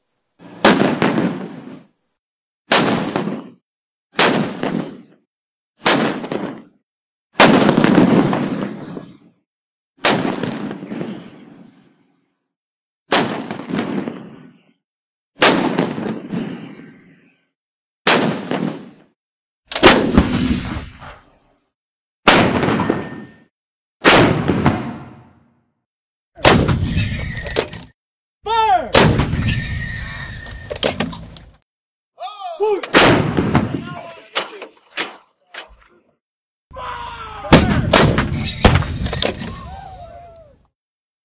Artillery Shots
arm, arms, army, artillery, bang, black-powder, boof, boom, cannon, explosion, explosive, howitzer, ka-bang, kabang, ka-boom, kaboom, ka-pow, kapow, military, pow, shooting, strategic, tactical, weapon